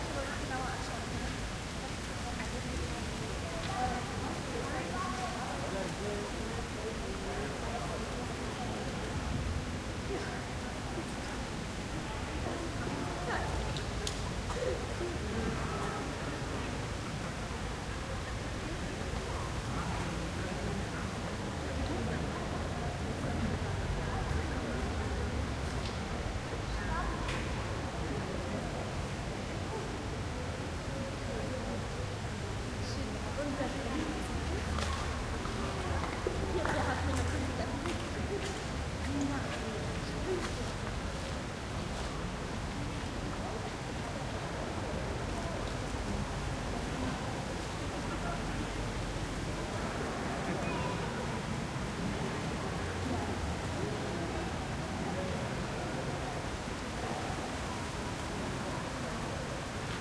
Square, Piazza, Plaza with few people - Stereo Ambience
A wide square in front of a church with only a few people, almost no traffic noise, summer in the city
ambiance, ambience, ambient, atmo, atmos, atmosphere, background, background-sound, field-recording, stereo